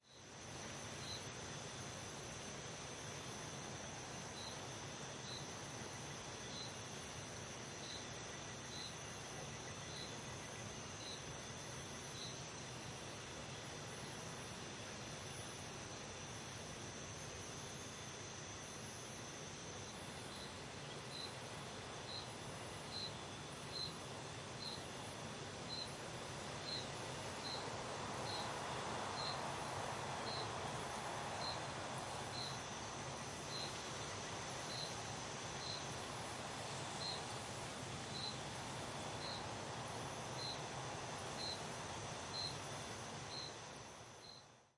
Night Ambience
Recording of a calm natural environment by night, with cicadas background, crickets activity, very distant light traffic, a faint faraway dog barking and the wind rustling through leaves and bushes.
Zoom H6 with XY mics.
uruguay, night, ambiance, field-recording, ambience, argentina, neighborhood, calm, crickets, cicadas, residential, ambient, quiet, wind